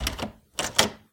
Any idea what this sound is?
Door-Handle-Jiggle-01
The sound of a front door's handle being jiggled or shaken as if locked.
Door, Handle, Jerk, Jiggle, Knob, Shake, Wooden